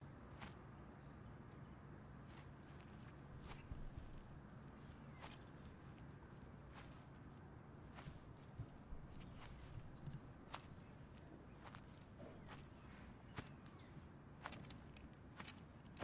grass footsteps
footsteps in grass
footsteps, walking